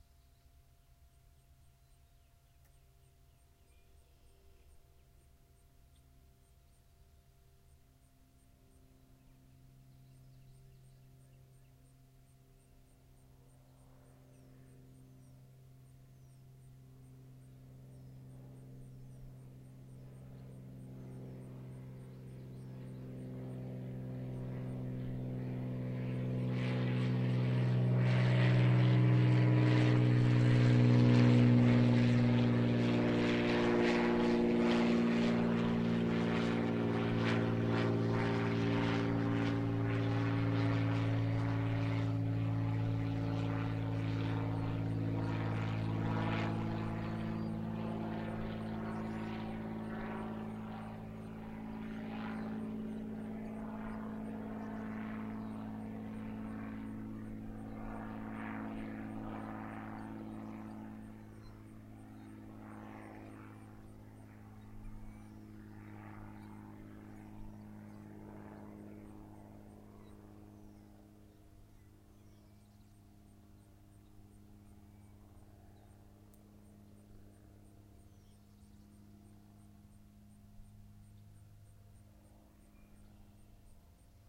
I live near a rural airport. Small-engine plane flying by. Distant sound, not close up. I left a lot of ambience on the front and back end so you can choose when to fade in and out.
airplane, fly-by, plane